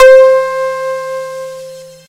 41 elec guitar tone sampled from casio magical light synthesizer